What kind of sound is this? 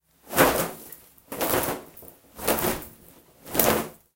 1) Dragon wings part 1
foley for my final assignment, dragon wings